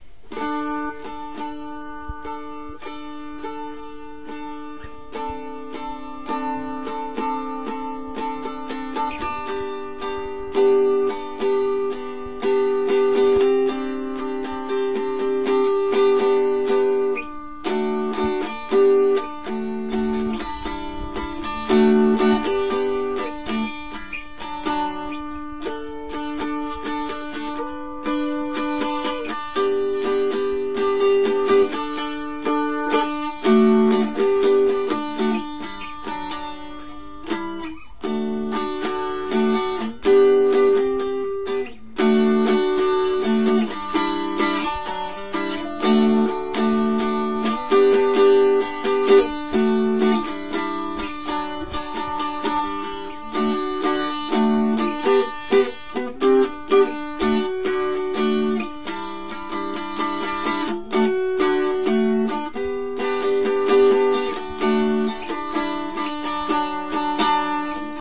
Appalachian Dulcimer Jam
My dad playing on his Appalachian dulcimer, with a warm sound. I'm pretty sure the melody is a Hindu chant. Recorded with a Tascam DR-40.
Appalachian-Dulcimer, folk-music, music